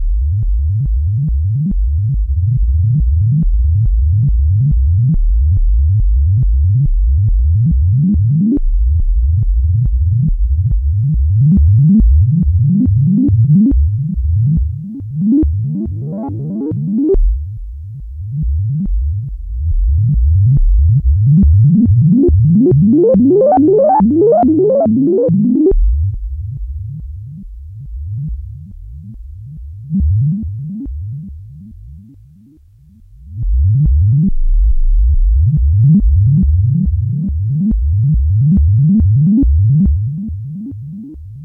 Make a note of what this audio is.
A Whole-Tone scale midi sequence via CV played by all 3 oscillators of the Arp 2600 with the filter manipulated by hand. The Pulse width of osc. 2 is controlled via an external LFO on triangle wave. The mono output fed directly to Line amp in AMEK CIB, slightly compressed and straight into Digidesign mBox